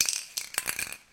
prise de son fait au couple ORTF de bombe de peinture, bille qui tourne